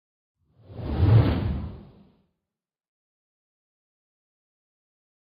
long wispy woosh3
01.24.17: Long slowed-down woosh for motion design with a lessened low-end.
attack, high, light, long, motion, move, moving, swing, swish, swoosh, whip, whoosh, wispy, woosh